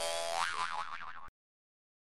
I sampled a single note, which then fed OK into a midi keyboard, allowing me to effectively play Jews harp melodies. The result was pretty OK.